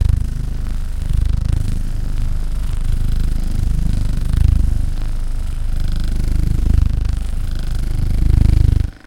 vibrations plastic
Running a muscle massage gun over a plastic bag on a carpet. I don't know who would use this, but I find the sound quite pleasing.
plastic, buzz, vibrations, mechanical, massage, machine, stereo, vibrator